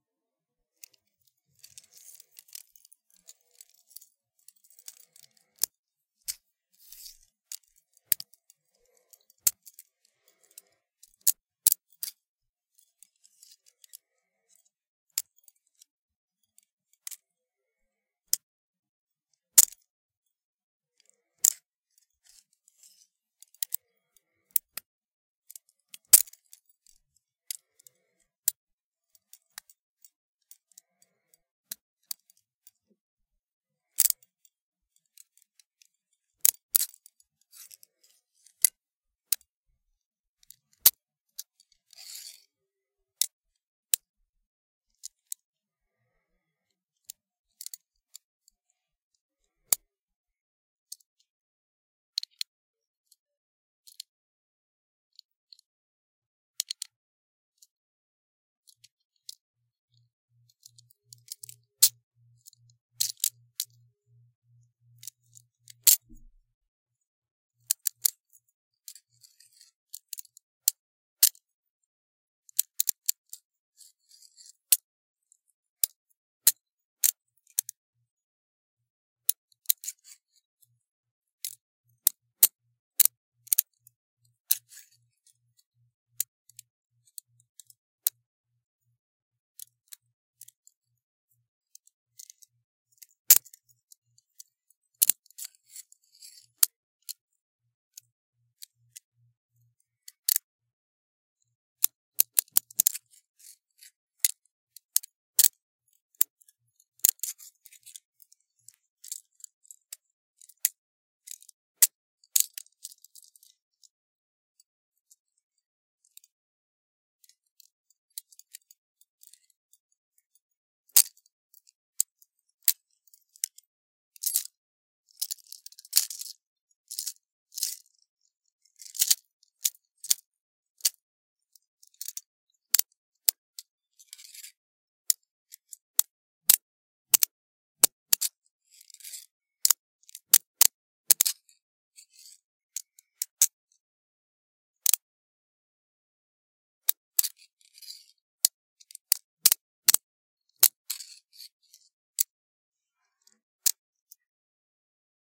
Pair of handcuffs being open, closed, and fiddled with.
handcuffing, cuffs